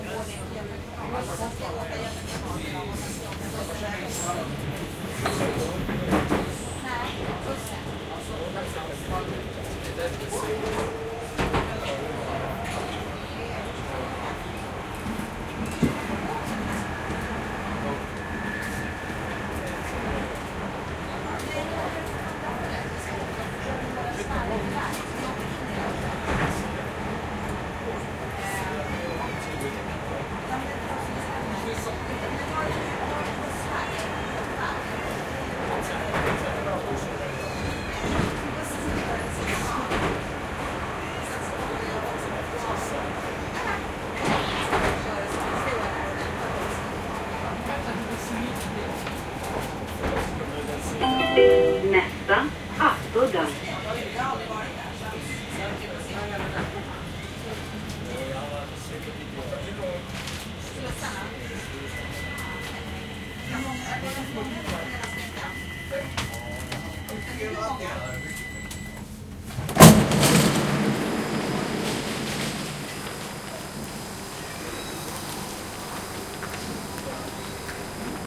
170721 StockholmMetro Aspudden INrideEX F
Inside an underground railway train arriving at the stop Aspudden in Stockholm/Sweden. The recorder is situated in the middle of the car, voices, train noises and the computer-voice announcer can be heard. The train is a fairly new model. At the end of the recording, the doors open and the recorder alights onto the platform.
Recorded with a Zoom H2N. These are the FRONT channels of a 4ch surround recording. Mics set to 90° dispersion.
urban, tunelbahnen, interior, riding, Europe, ambience, underground, surround, traffic, people, Sweden, Stockholm, field-recording, metro